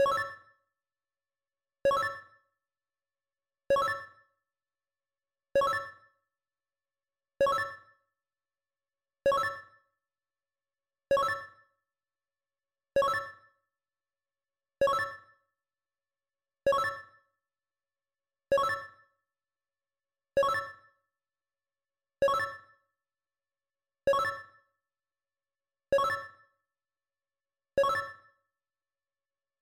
Success audio made from BeepBox